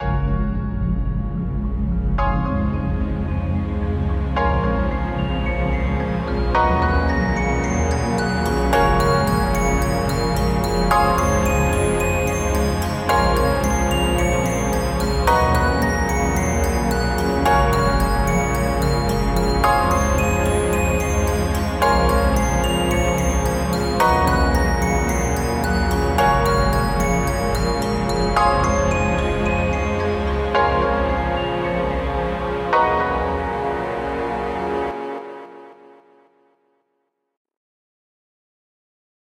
A polychord phase created in Bitwig using third party effects and plugins